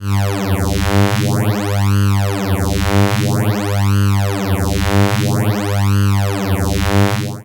GALLE Florian 2013 2014 son1
**Production
Made using only Audacity :
- Generate a sawtooth wave (frequency 100 Hz, amplitude 0.4)
- Apply an effect phaser (15 phases, modify 157, depth 176)
- And apply a cross fade in and a cross fade out
**Typologie: Continu varié
**Morphologie :
Masse : son cannelé
Timbre harmonique : envoutant, sous-tension, méfiance
Grain : le son parait rugueux mais strident
Allure : le son comporte un vibrato (coté chantant)
Dynamique : l'attaque est plutôt abrupte mais sans surprendre l'oreille
Profil mélodique : variation serpentine
Profil de masse : se situe au niveau de la hauteur d'un son d'avion
electricity, voltage